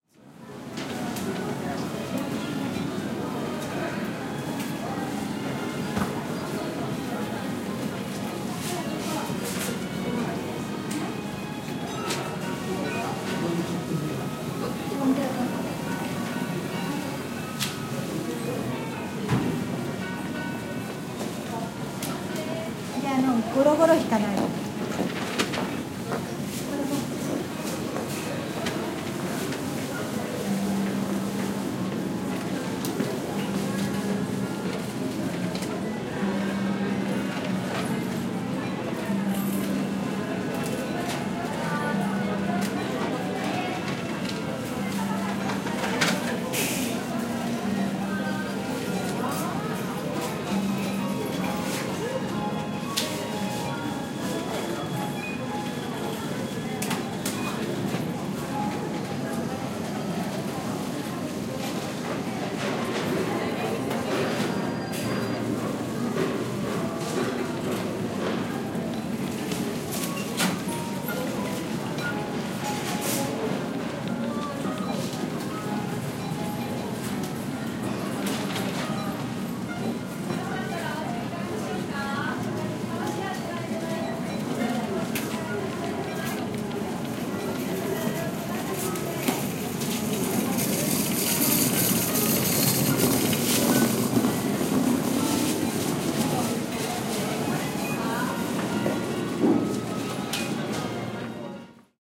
Tokyo - Supermarket
Waiting in line in a supermarket in Hiroo. General store ambience, voices, coins, cash registers. Ends with a trolley being wheeled past. Recorded on a Zoom H4 in May 2008. Unprocessed apart from a low frquency cut.
ambience, cash, check-out, field-recording, hiroo, japan, register, supermarket, tokyo